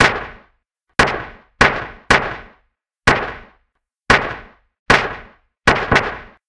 A series of shots made by processing a vintage Linn hand clap module with compressor, lfo-driven filter and reverb. Because of the lack of ambiance it easy to use for pistol shot Foley.